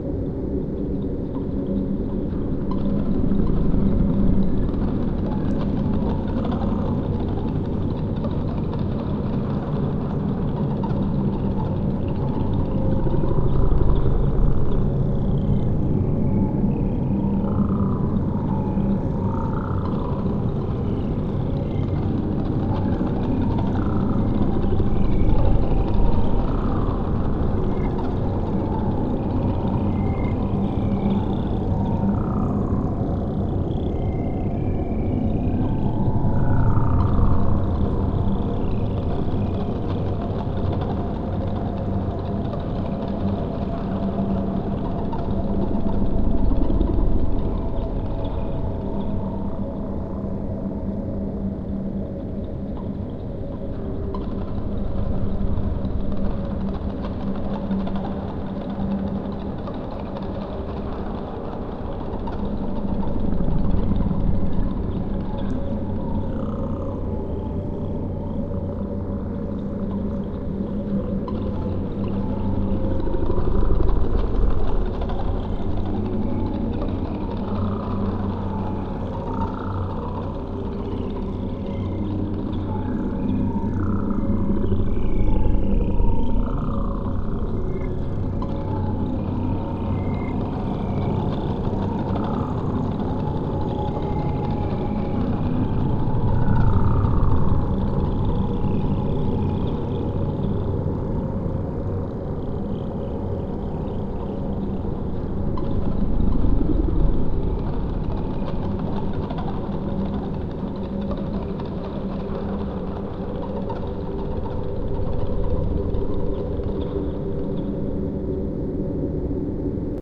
Dark alien ambience for dark games, films and moods.
This is a mix in Audacity of these tracks:
I recommend you credit the original authors as well.